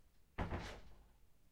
door closing
field-recording, movement, door, close